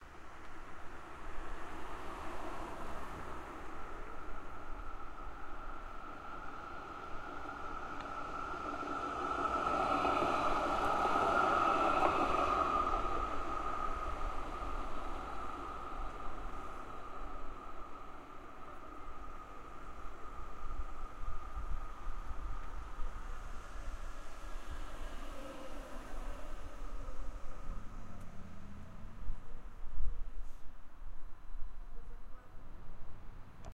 A train which passes
Cars, City, Tram